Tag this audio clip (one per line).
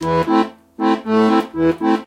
emotional
rhythmic
organ
harmony
riff
loopable
chord
sad
melody
keys
dramatic
accordion
harmonic
bar
minor
arpeggio